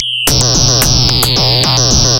A rhythmic loop created with an ensemble from the Reaktor
User Library. This loop has a nice electro feel and the typical higher
frequency bell like content of frequency modulation. Experimental and
overdriven. The tempo is 110 bpm and it lasts 1 measure 4/4. Mastered within Cubase SX and Wavelab using several plugins.